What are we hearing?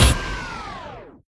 Gatling Gun Wind Down
Gatling gun's wind down. Helpful for re-mixing and sending off to video games
cannon, down, fight, gatling, gun, war, wind